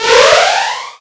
////////// Made using Audacity (only) :
Generate 1 whistle form
Saw 440Hz to 1320
Linear interpolation
Applied GVerb
Roomsize = 75,75 / Reverb time = 7,575 / Damping = 0,5 / Input Bandwidth = 0,75 / Dry signal level (dB) = -70,0 / Early reflection level (dB) = 0,0 / Tail level (dB) = -17,5
Normalized the track to -0,10 dB
Applied long fade out
////// Typologie : Continue variée (V)
////// Morphologie :
Masse : son seul nodal (gradation)
Timbre : Acide, montant en puissance, aggressif
Grain : Rugueux
Allure : Pas de vibrato
Attaque : violente et soudaine, tempérée par le fondu en fin
Profil mélodique : variation serpentine (sifflement)
Profil de masse / calibre : sons égalisés